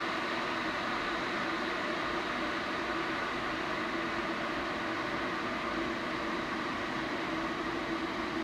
Boil water (Electric kettle)
The water boil in the electric kettle.
water, Bubble, Boil